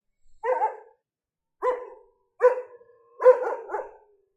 Dog barking in the street
| - Description - |
A dog barks on a street.
animal, bark, street